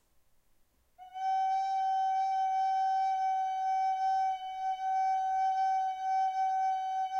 flute sol
Very unprofessional SOL note of a regular flute. Used for Python programming training on Digital Signal Processing subject. Not aimed for sound usage